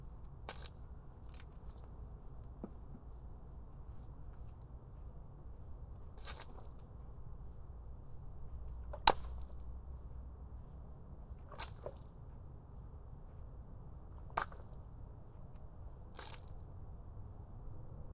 Water Splashes on cement FF236
Water liquid splash splat spill on cement
splat, spill, splash, liquid, Water